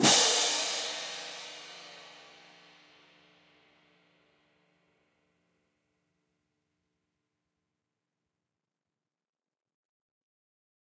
Marching Hand Cymbal Pair Volume 21
This sample is part of a multi-velocity pack recording of a pair of marching hand cymbals clashed together.
crash, cymbals, marching, orchestral, percussion, symphonic